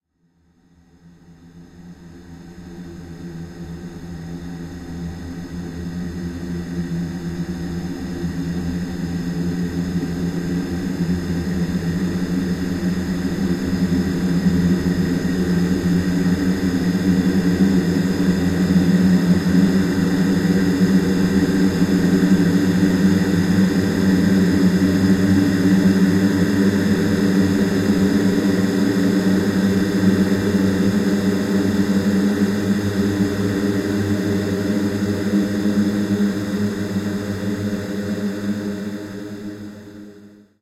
Monk Om
Ambience for a cave or religious building in a dark setting video game I'd say.
Recorded with Zoom H2. Edited with Audacity.
humm, humming